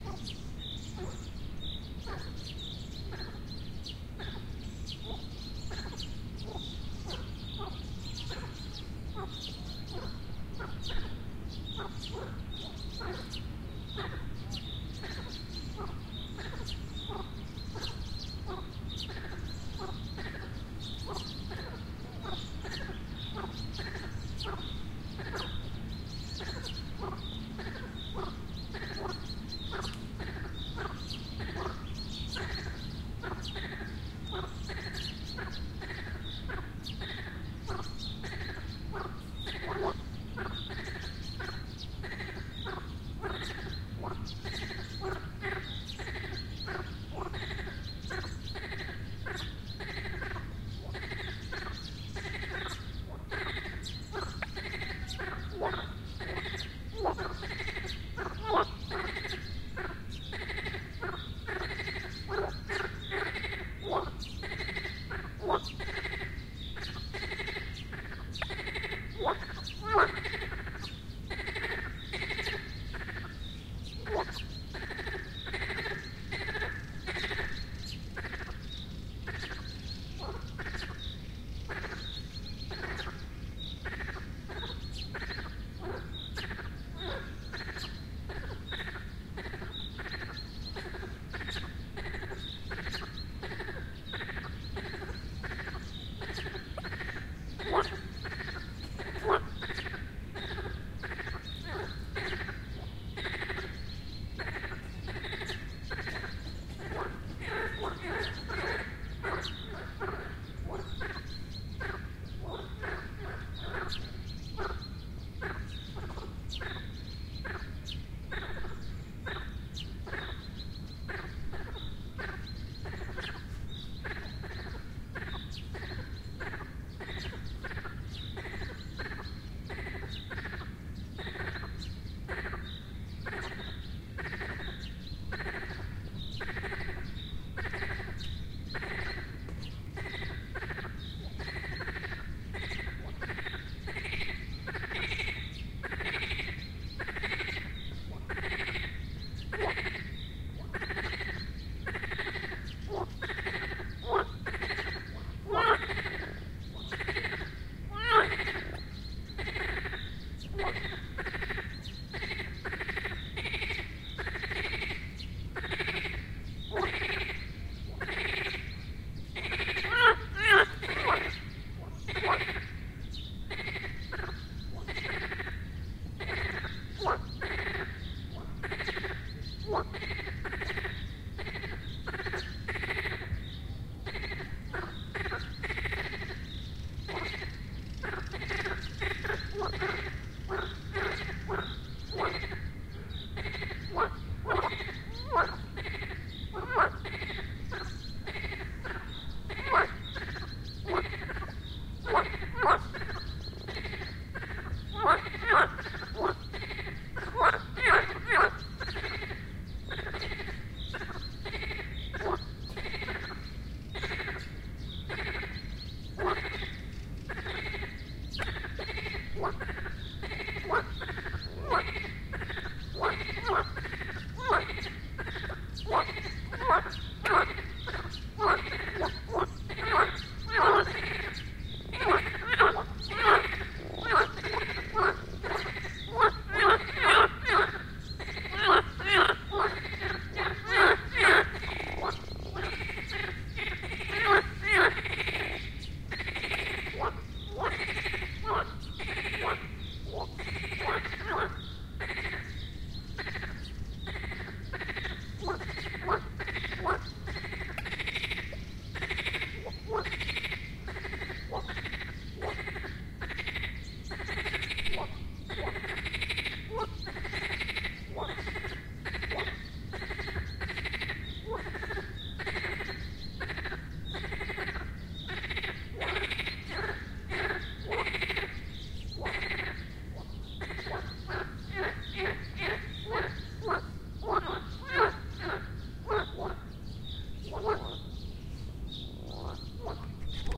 Croaking frogs or toads. Swamp in the city park.
Recorded: 2015-06-25
Recorder: Tascam DR-40